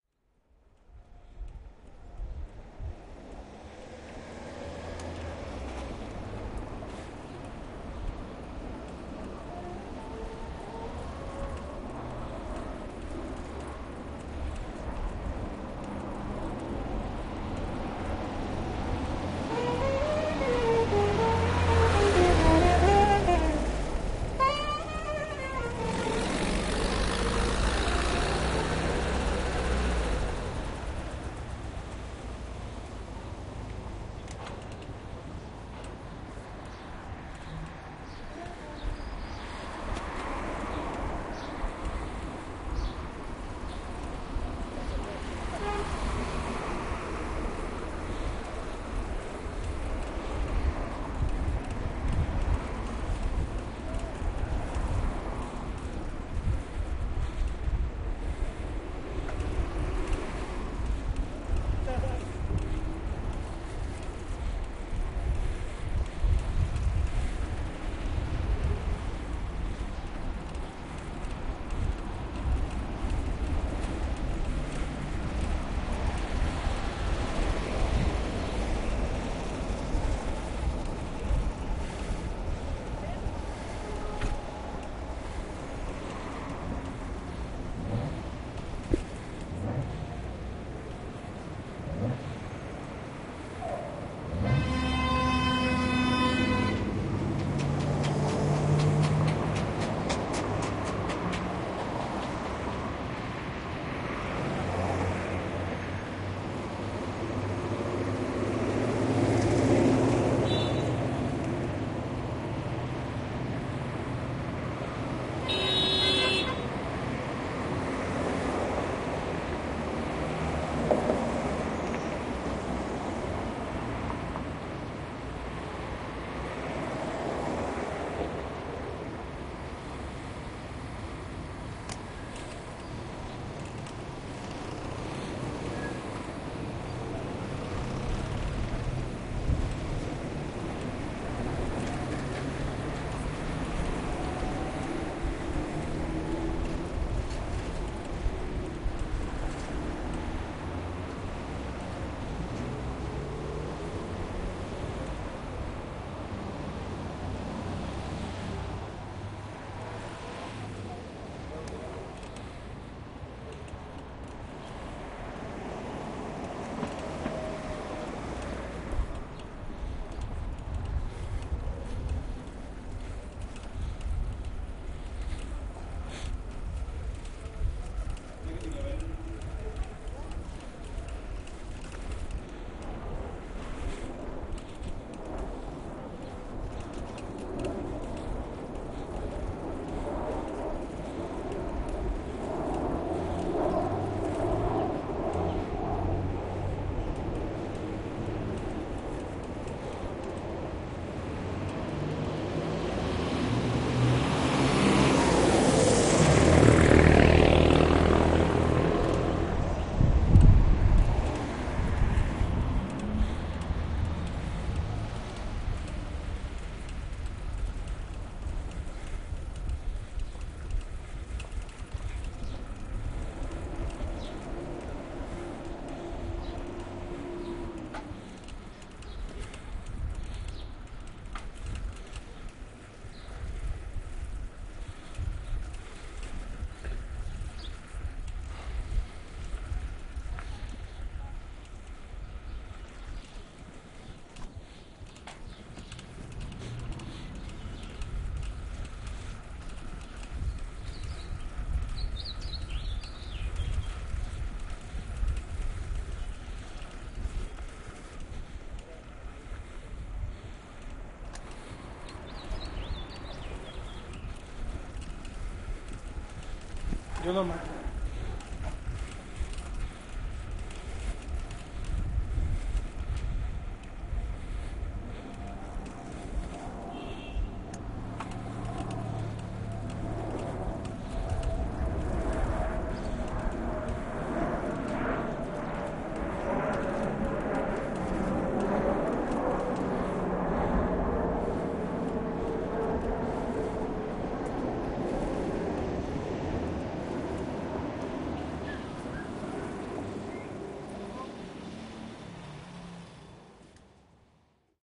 Paseo en bicicleta en la ciudad / Bike ride in the city
Realicé esta grabación en un pequeño traslado en bicicleta durante mayo del año 2012 a las 18:00 hrs.
Grabado con una Zoom H1.
I made this recording in a small bicycle shuttle during May 2012 at 18:00 hrs.
Recorded with a Zoom H1.